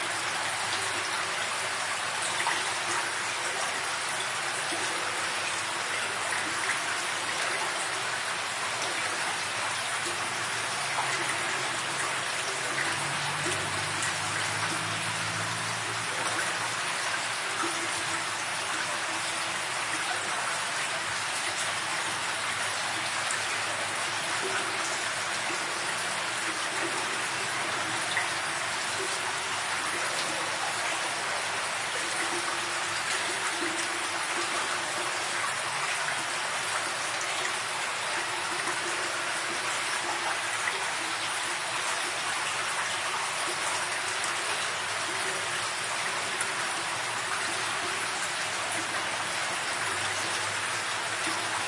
1004 - stream tunnel loop1
Stereo seamlessly loopable recording of a stream inside of a tunnel.
creek, loop, stream, tunnel, water